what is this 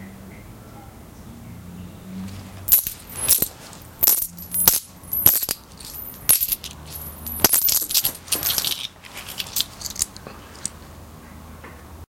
Throwing coins in hand OWI
Recorded with rifle mic. Coins being thrown in a hand.
coins, OWI, Throwing